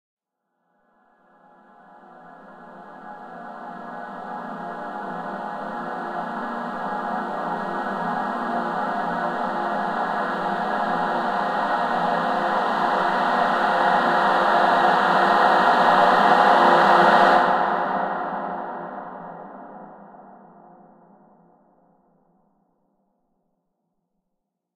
Dissonant Choir Rise 001
Dissonant Choir Rise Sound Effect. Created by layering different choir samples and adding both extensive delay and reverb effects.
Choir, Cinematic, Dissonant, Drone, Film, Movie, Rise